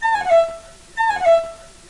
Looped elements from raw recording of doodling on a violin with a noisy laptop and cool edit 96. Slower descending quadruplets with a slid 3rd note and some tempo defying trickery.
violin, sliding, loop, triplet, noisy, descending